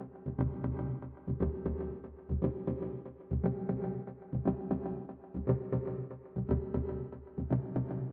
charcoal grey chords loop
a chord loop which is used in one of my tracks called Charcoal Grey.
bass, fl-studio, serum, rance, techno, chords, loop, electronic, synth, electro